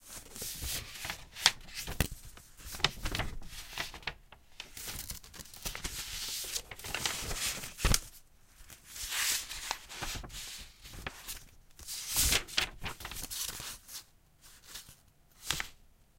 Leafing through papers
Leafing through some papers.